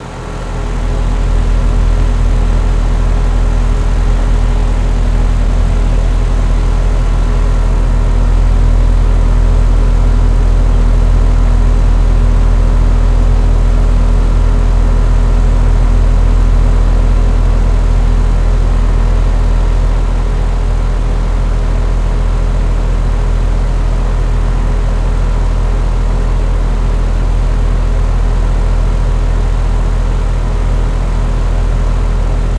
Recorded a generator for my air conditioner with a Zoom H6 with the lows.